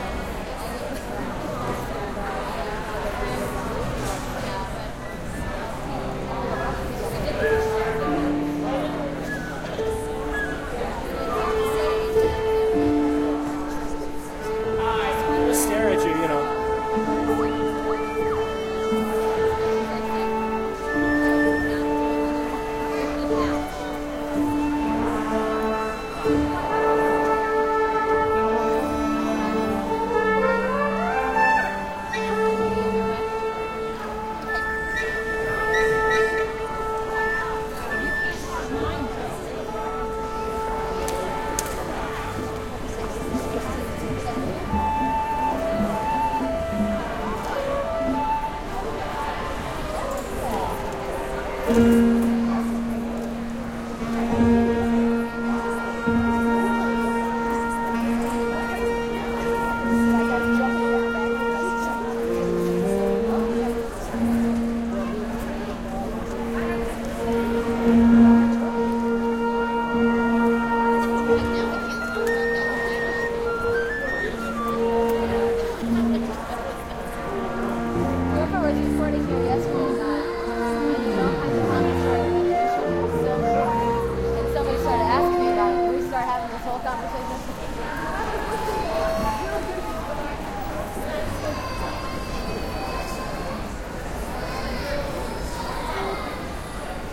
audience-orchestra-warmup
Orchestra warming up and crowd noise before a high school musical
ambient, talking, highschool, concert, crowd, musical, audience, warmup, tuning, orchestra, people